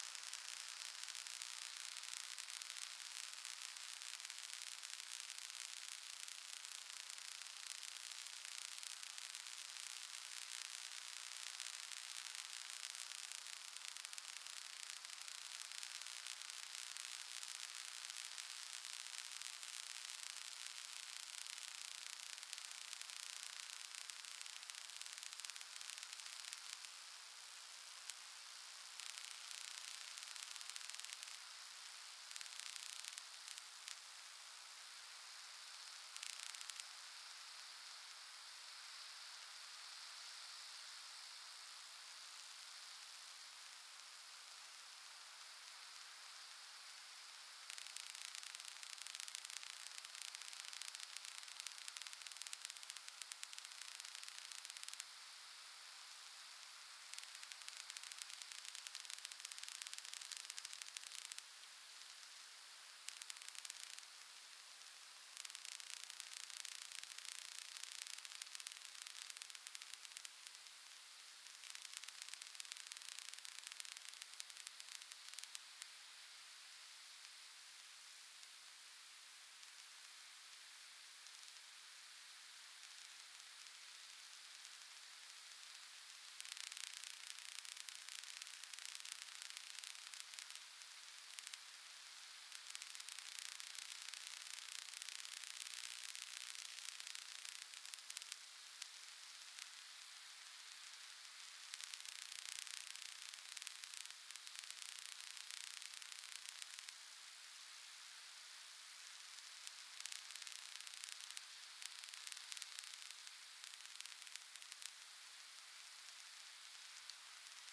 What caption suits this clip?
electric crackle buzz high tension powerline hydro dam
recorded with Sony PCM-D50, Tascam DAP1 DAT with AT835 stereo mic, or Zoom H2
hydro, electric, crackle, dam, powerline